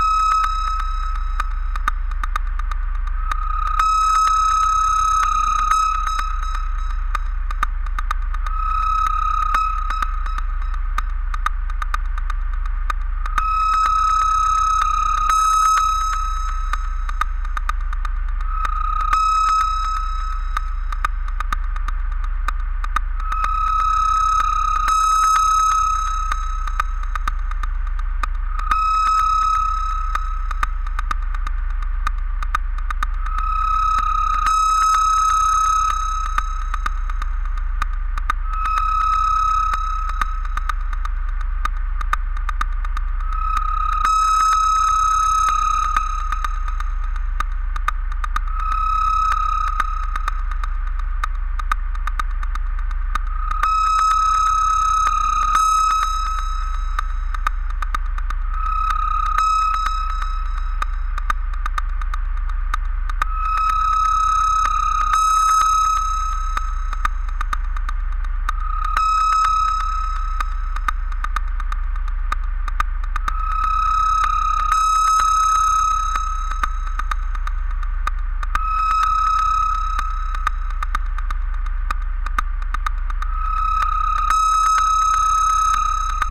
scaryscape thrillfeedcussions
a collection of sinister, granular synthesized sounds, designed to be used in a cinematic way.
movie, fear, creepy, bakground, film, alien, mutant, lab, criminal, illbient, abstract, feedback, cinematic, dark, ambience, experiment, monster, pad, horror, granular, drama, bad, ambient, percussion, atmosphere, filter, noise, effect, electro, drone